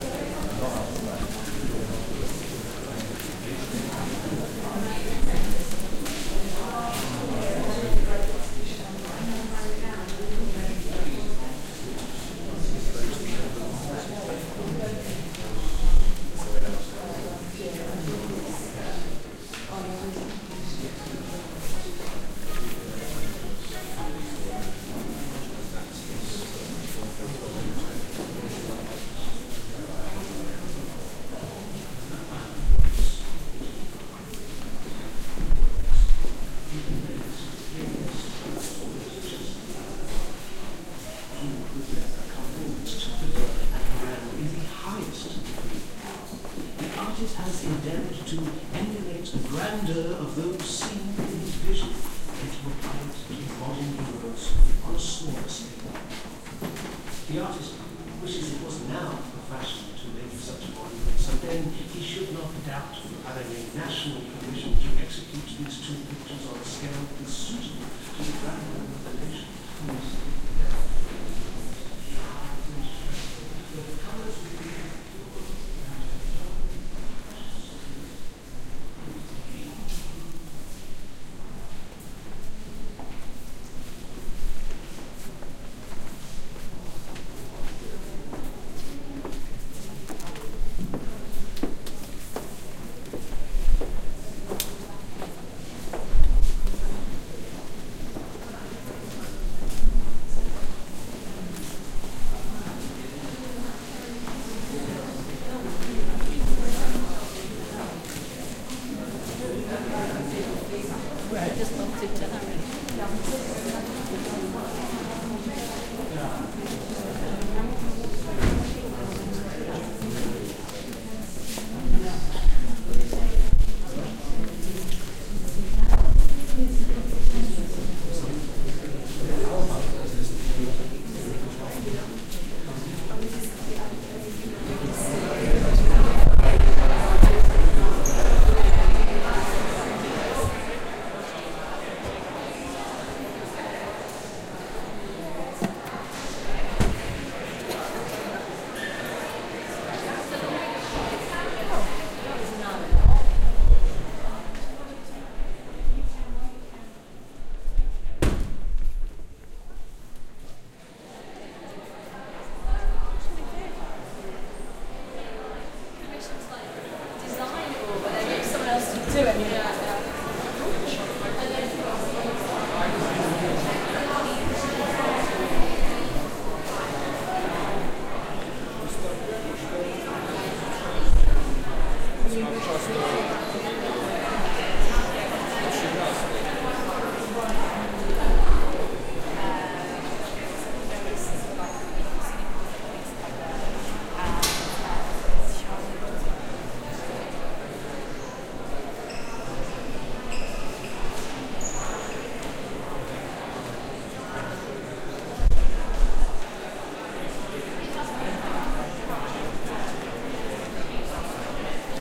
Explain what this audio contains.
Walking around and out of busy exhibition in Tate Britain
Footsteps, Art, Gallery, Museum, Ambience